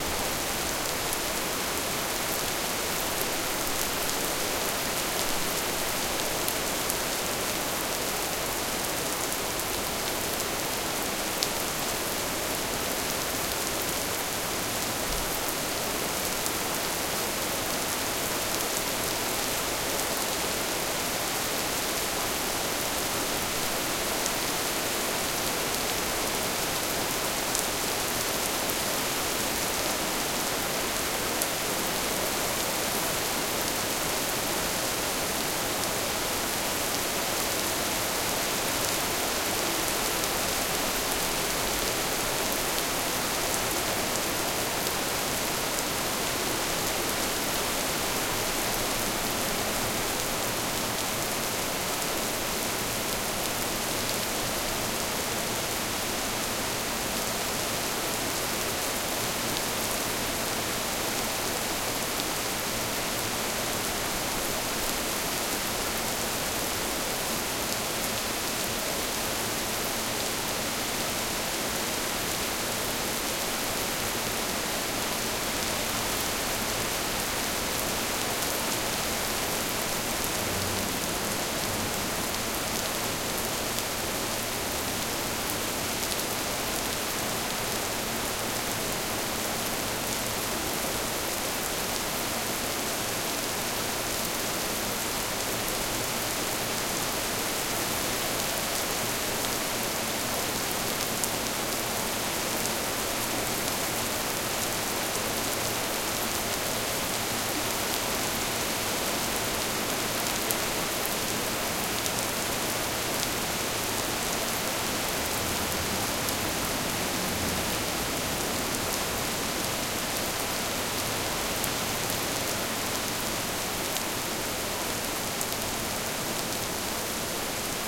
Rain Pluie
Two minutes of rain, you know what to do ;-)
rain, weather, shower